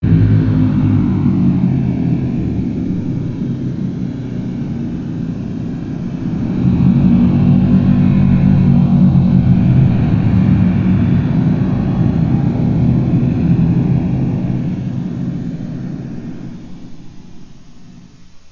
Unfa Fart Remix
A special FX remix of Unfa's Authentic Human Fart. Slowed down %70 then Paul-Stretched 6x by .24 then added a plate reverb.
Gross, Gas, FX, Human, Fart